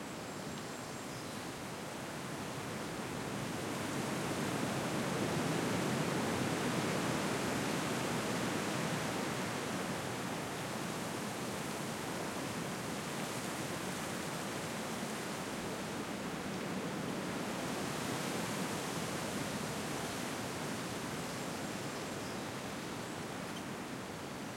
Ambiance (loop) of wind in forest (Moderate).
Gears: Zoom H5